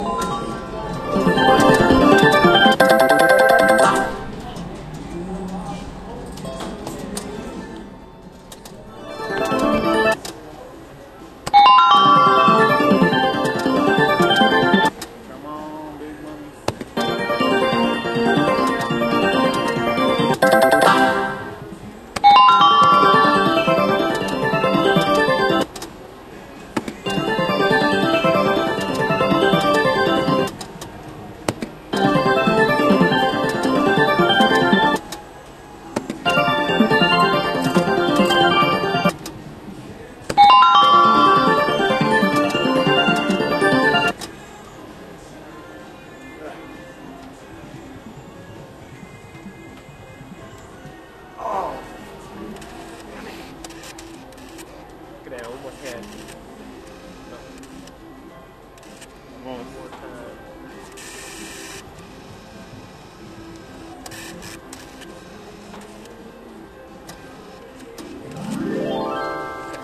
WOF slots3
Slot machine noises, Wheel of fortune bell, player saying “Come on big money” in background, losing and putting in more money into machine.
Casino, noises, clicking, machine, background, slot